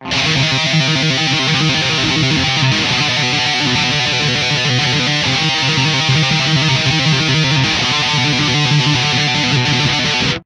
a metal like trill, maybe good for an intro recorded with audacity, a jackson dinky tuned in drop C, and a Line 6 Pod UX1.